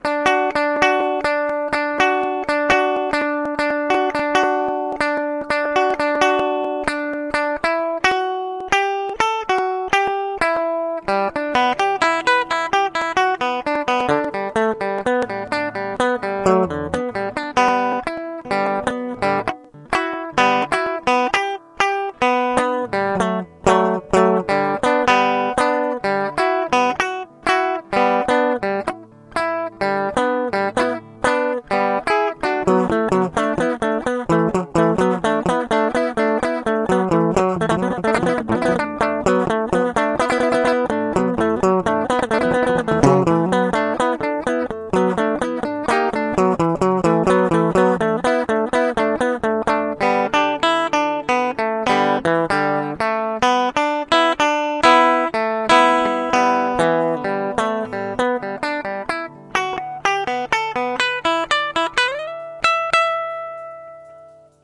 My dad playing the guitar.
A while back, Yoshitoshi was having a remix contest for Sultan featuring Zara Taylor - "No Why", and for my submission I wanted to try adding a live interpretation/cover of the guitar loop.
So I asked my dad but he got way too carried away in all kinds of directions I didn't want to take.
But this might be useful to someone.
session, rock, practice, folk, guitar